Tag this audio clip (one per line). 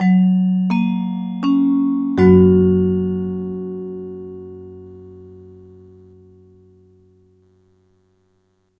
airport
announcement
automated
beginning
gong
intro
platform
railway
station
tannoy
train